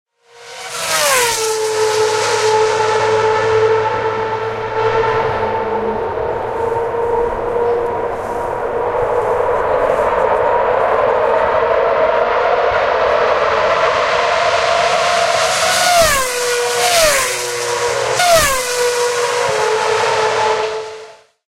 Monza track side
Track Side Monza Milan Italian GP 2014
Approaching F1 Cars Recorded on Olympus LS10
sampler material